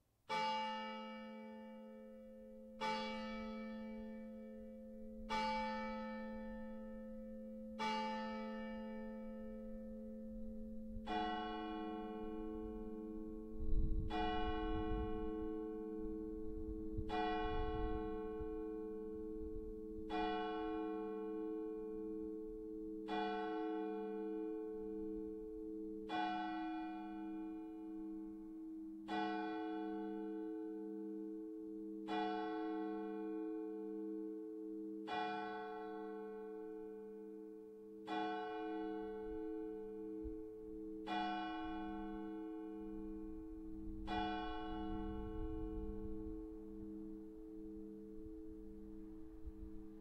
I recorded the Church Bell in our Village at 0:00 am
used an AKG c 1000s Microphone, Steinberg CI 2 interface
Bells, Church, Kirche, Night